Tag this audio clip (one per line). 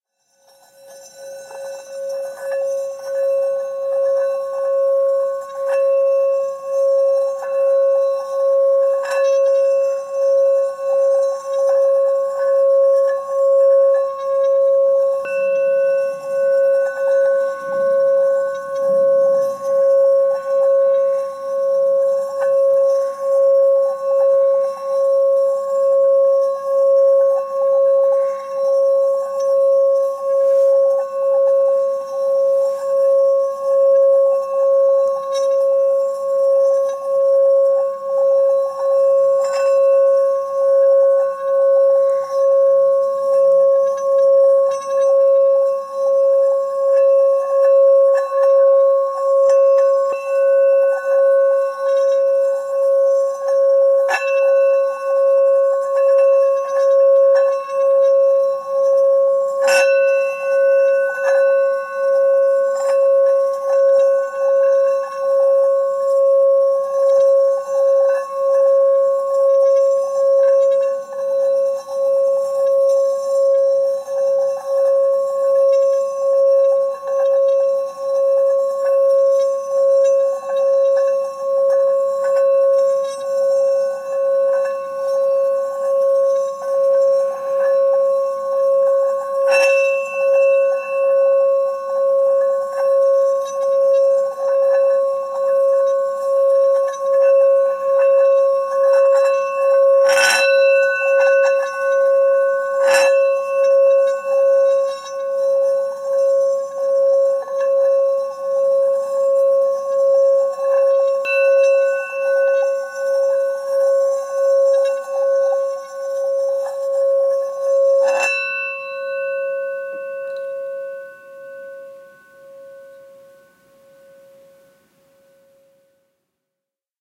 Buddhist
bell
bowl
Singing-bowl
Singing
Meditation